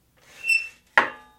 Chair-Folding Chair-Metal-Fold-01
Here's the sound of a common metal folding chair being folded up.